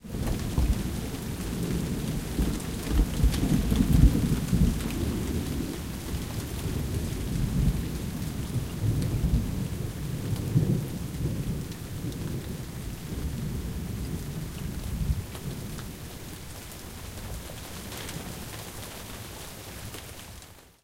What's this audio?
Light rain with very distant thunder. Can be used as FX.
Recorded to tape with a JVC M-201 microphone around mid 1990s.
Recording was done through my open window at home (in southwest Sweden) while this storm passed.